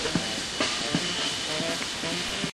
Snippet of ambiance recorded in Washington Square in Manhattan while a saxophonist and a drummer improvise and the fountain hosts strange modern art performers recorded with DS-40 and edited in Wavosaur.